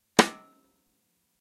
samples in this pack are "percussion"-hits i recorded in a free session, recorded with the built-in mic of the powerbook
drums, rim, rim-shot, snare, unprocessed